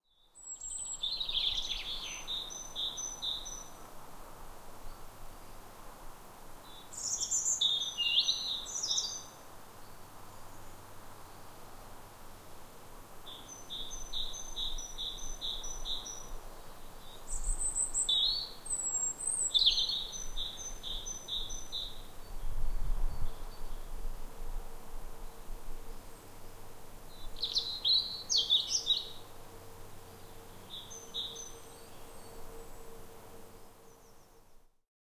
A spring day in late March 2008 at Skipwith Common, Yorkshire, England. The sounds of a chaffinch, a Great Tit, a wren and general woodland sounds including a breeze in the trees and distant traffic.